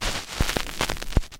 The stylus hitting the surface of a record, and then fitting into the groove.